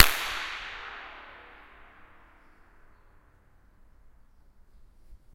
clap at saltdean tunnel 10
Clapping in echoey spots to map the reverb. This means you can use it make your own convolution reverbs
echo, filed-recording, ambient, reflections, convolution-reverb, clap, smack, atmosphere